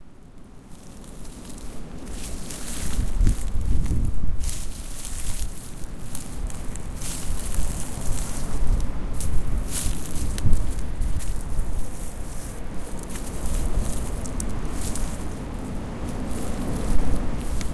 Walking slowly through a patch of dried leaves
Walking through dried leaves very slowly, some wind can be heard
Walking, Leaves, Field-Recording, Crunching